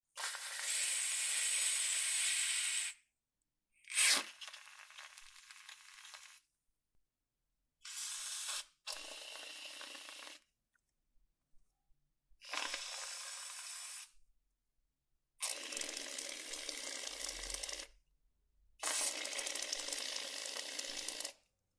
I found an old aerosol canister of whipped cream in my fridge. It was waaaay past its expiration date, so I figured I could get some fun sounds out of it before I tossed it in the trash and felt guilty about wasting food...